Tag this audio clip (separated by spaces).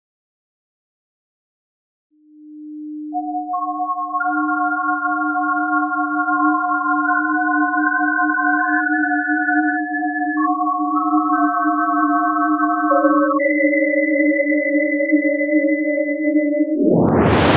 alien ambient eerie sci-fi space synthetic